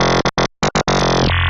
Zample - who knows
random wet noodle grinding noise made on fl studio with a basic ocs and some phasers
synth, dj, noise, electronic